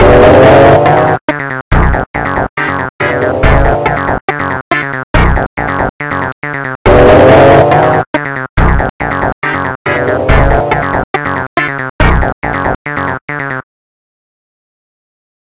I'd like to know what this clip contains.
Thriller action music videogame Indie
Simple Loop track
music, melodia, videojuego, synth, melody, loop, electronic, techno, indie